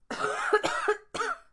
wheezy coughing
wheezing, cough, wheezy, ill, sickness, sick, coughing, cold